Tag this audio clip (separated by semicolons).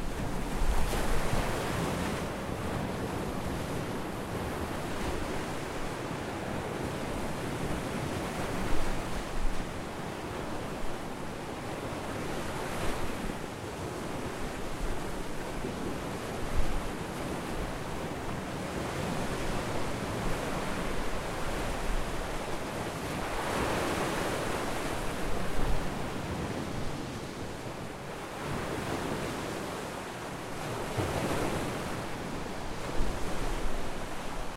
beach; wave; shore; rocks; water; sea; north-Ayrshire; crash; windy; wind; saltcoats; clyde